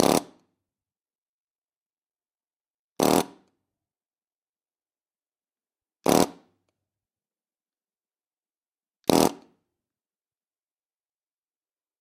Pneumatic hammer - Deprag zn231 - Start 4
Deprag zn231 pneumatic hammer started four times.
labor, work, deprag, crafts, hammer, tools, pneumatic-tools, motor, air-pressure, pneumatic, metalwork, 80bpm, 4bar